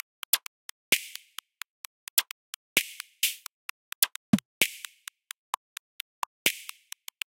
GlitchDybDrum 130bpm
drum, loop, glitch